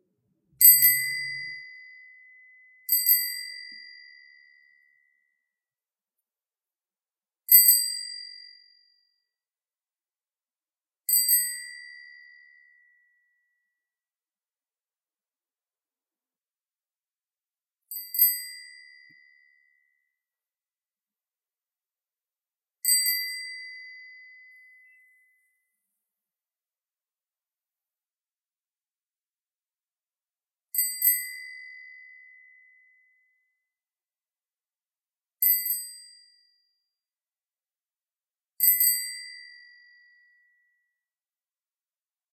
bicycle bell
sf, clean, bicycle-bell, bicycle